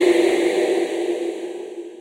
convoluted back to back loop 60 bpm 5h
This is loop 40 in a series of 40 loops that belong together. They all have a deep dubspace feel at 60 bpm and belong to the "Convoloops pack 01 - back to back dubspace 60 bpm" sample pack. They all have the same name: "convoluted back to back loop 60 bpm"
with a number and letter suffix (1a till 5h). Each group with the same
number but with different letters are based on the same sounds and
feel. The most rhythmic ones are these with suffix a till d and these
with e till h are more effects. They were created using the microtonik VSTi.
I took the back to back preset and convoluted it with some variations
of itself. After this process I added some more convolution with
another SIR, a resonator effect from MHC, and some more character with (you never guess it) the excellent Character plugin from my TC powercore firewire. All this was done within Cubase SX.
After that I mastered these loops within Wavelab using several plugins:
fades, equalising, multiband compressing, limiting & dither.
60-bpm, deep, dubspace, space